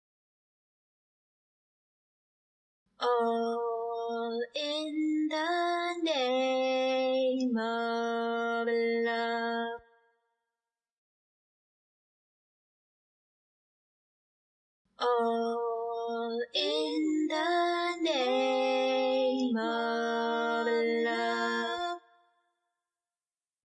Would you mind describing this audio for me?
female singing name of love
All in the name of love. A random vocal piece made at 80bpm. Modulations and changes can be made upon request.